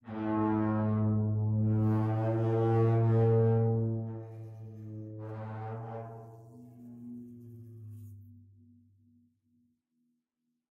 timp superball mallet 2
timpano (kettle drum) played with a superball mallet. starts with a low note, then rises about a semitone, then back down and bends some. sounds sort of like a giant horn in a big hall. (this is an acoustic recording, no effects have been added! the apparent reverb is from the drum itself, not the room)